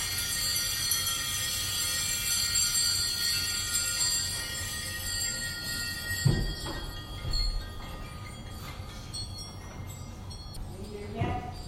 I found a set of bells somewhere and decided to record myself ringing them. I do not remember the context or where the bells were found, but they were beautiful in a strange and magical way. Someone's phone starts ringing at the end which was hilarious.